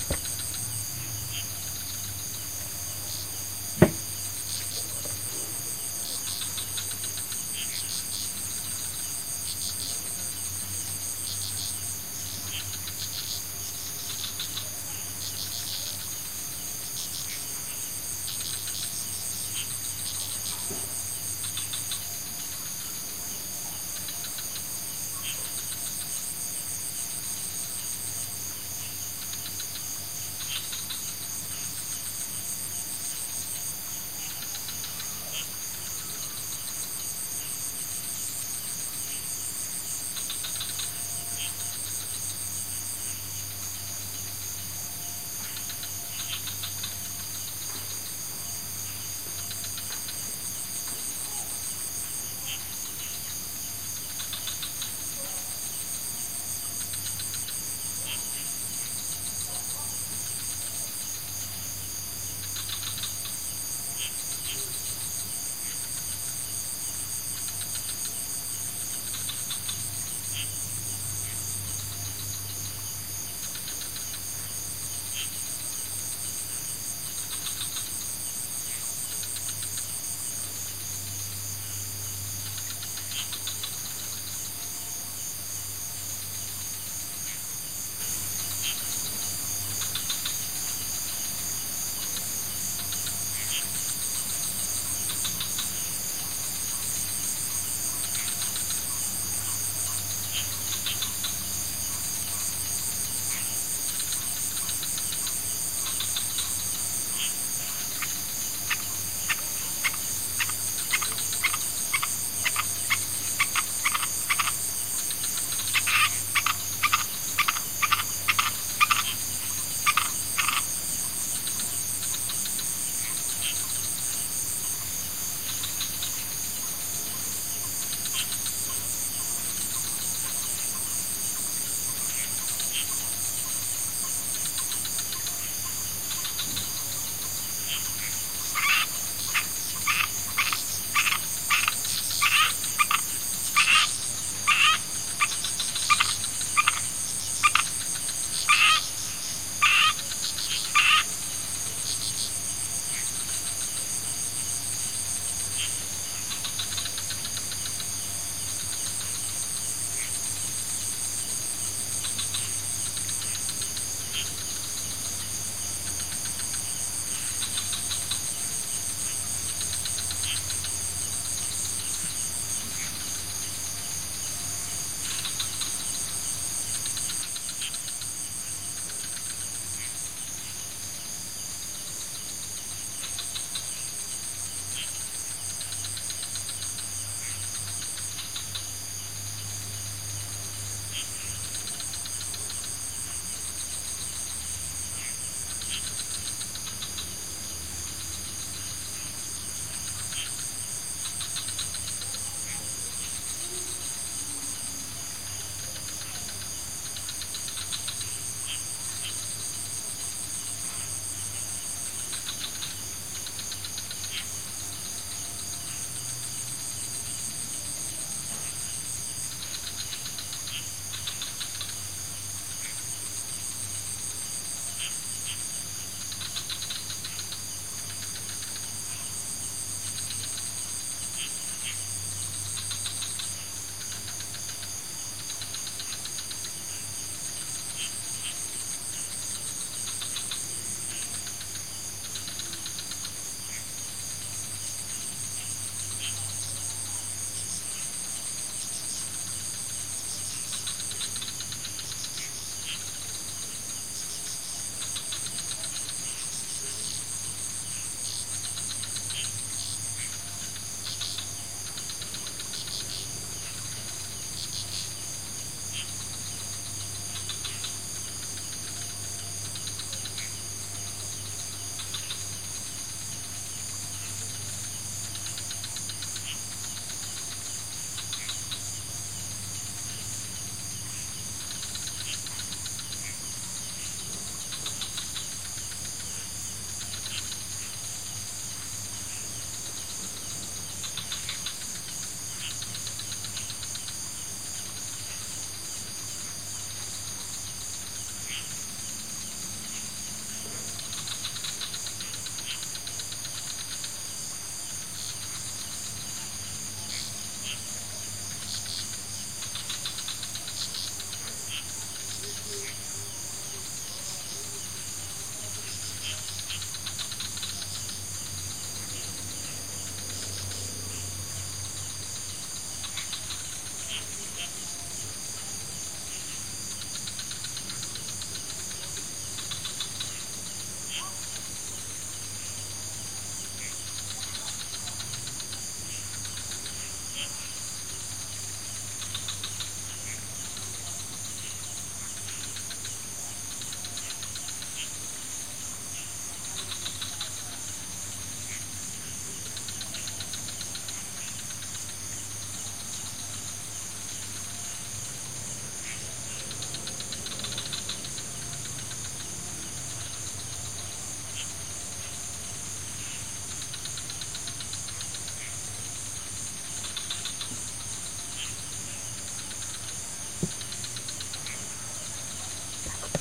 Frogs and Crickets Wapa di Ume
Frogs and crickets recorded at the Wapa di Ume resort in Bali, Indonesia. Internal microphones of the Zoom H4n
bali, ricefields, crickets, frogs, H4n, indonesia, field-recording